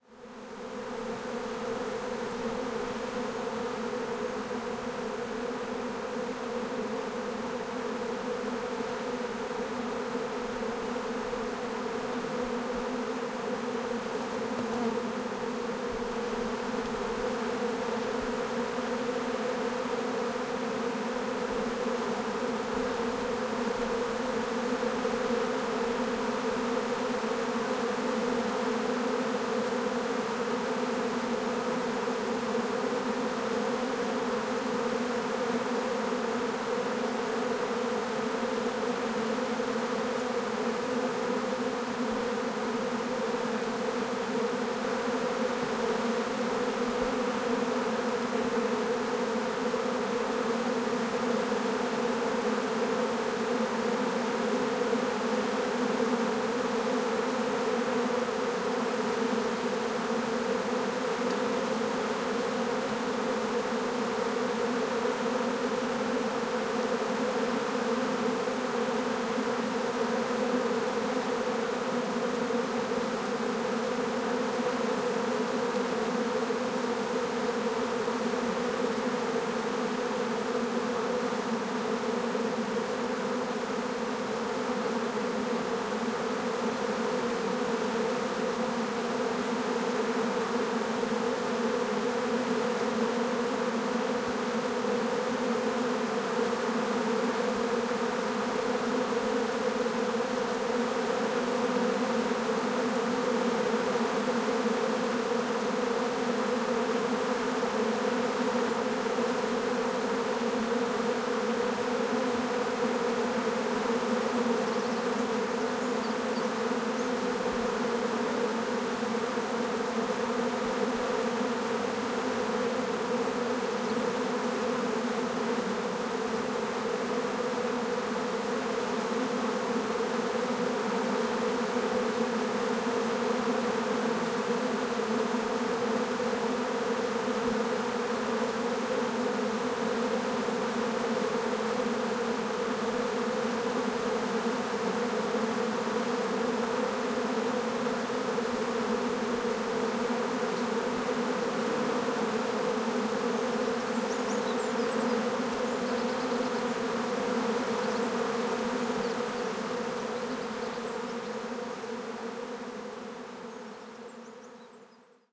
Essaim d'abeille noire sur une branche, gros plan.
Quelques oiseaux.
Ouessant, Bretagne, France, été 2021.
Recorded with Schoeps MS, reduced to LR stereo
recorded on Sounddevice 633
Black-bees swarm on a branch, close-up